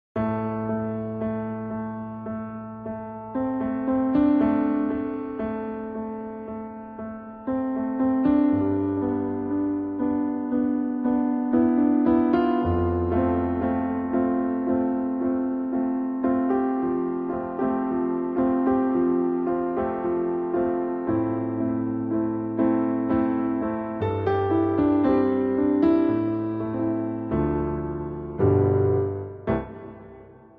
Original live home recording. I recorded this sample using Ableton grand piano midi sound and playing my CME midi keyboard, was not using any additional tracks. The chords were improvised and not rehearsed, no sheet music was used (it is all from my mind!) so such sound would sound great when chopped up and used in various different tracks, you can use some chords or just one, cut out the notes you like. My piano was inspired by pop, rnb and rock music, but I was classically trained. Now I play improvisations and write my own songs, if you want more sounds like this please send me a message:)